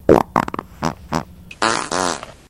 SHAVE & A HAIRCUT FART
space, frog, fart, laser, poot, nascar, flatulation, gas, flatulence, frogs, aliens, race, noise, weird, snore, beat, explosion, car